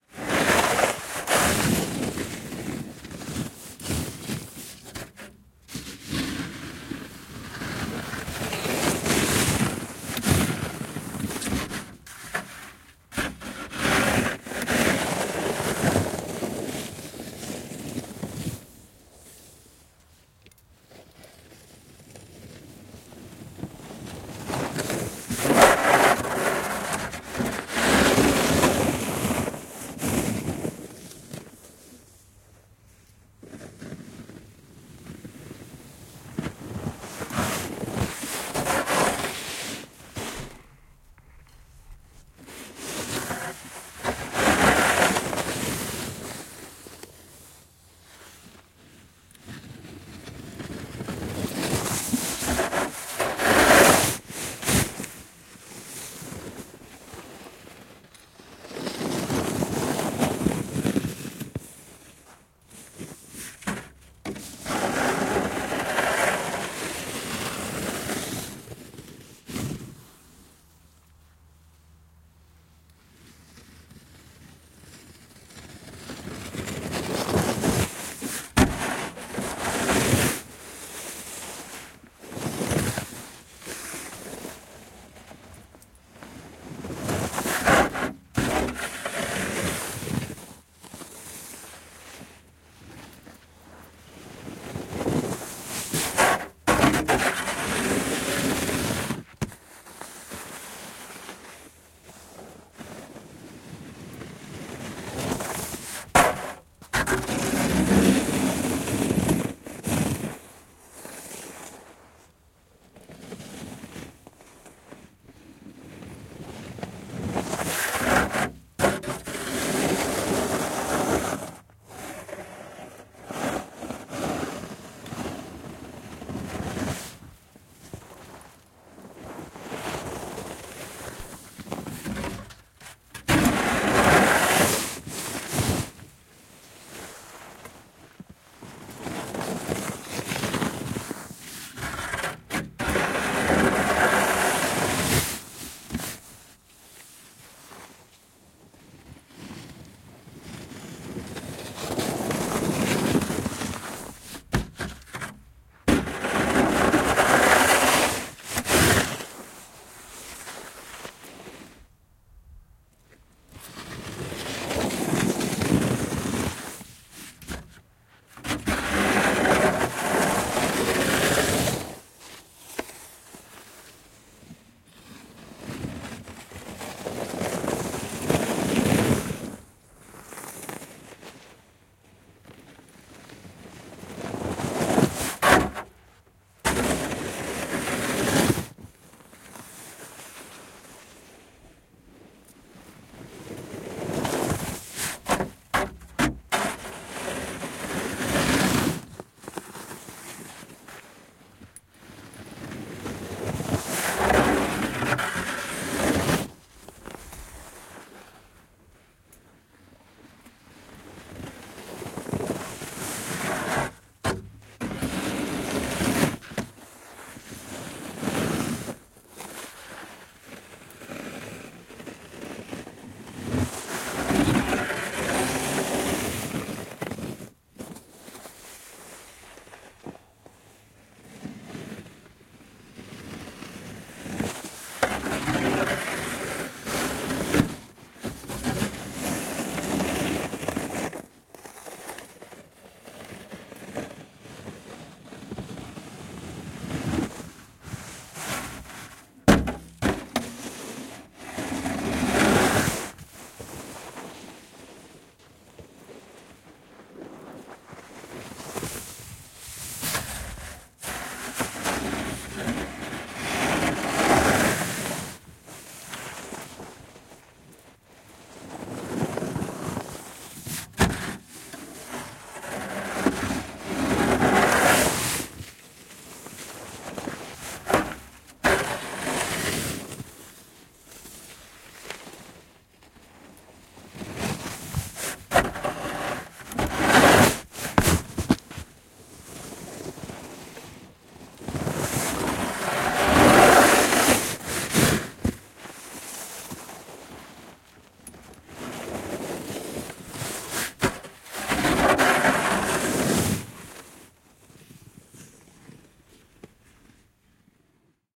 Lumikola, lumenluonti / A plastic snow pusher, a man clearing snow, rasping of the snow pusher in the snow
Muovisella lumikolalla aurataan, luodaan lunta. Lumikolan rahinaa lumessa.
Paikka/Place: Suomi / Finland / Vihti, Haapakylä
Aika/Date: 01.03 1984
Yle, Field-Recording, Cold, Snow-pusher, Tehosteet, Finland, Pakkanen, Winter, Snow, Talvi, Suomi, Lumi, Finnish-Broadcasting-Company, Soundfx, Yleisradio